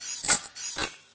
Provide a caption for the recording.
spogey robot walk
The sound a small robot named "Spogey" makes when he walks about on his two servo-controlled legs. A little bit Robocop Junior. Not so loud as to seem intimidating.
mechanical, robot, robot-walk, robot-walking, machine